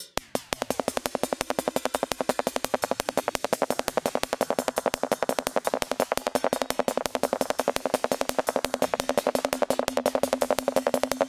Boolean Acid Hats
Electronic hi-hats percussionloop
electronic, high-hats-loop, percussion